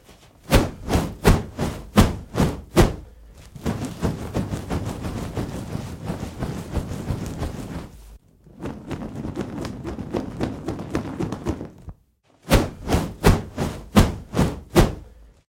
Processed whoosh recordings for you motion graphic, fight scenes... or when you just need a little whoosh to you sound design :) Add reverb if needed and it's ready to go.
If you use them you can send me a link.
Air, movement, rotation, whirl, Whoosh